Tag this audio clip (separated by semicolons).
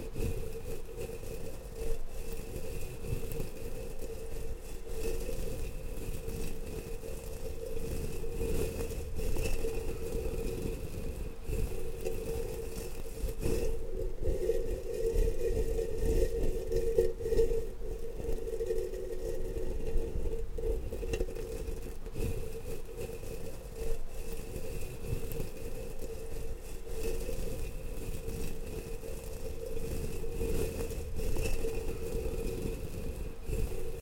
Facial; Condenser; Hair; Mic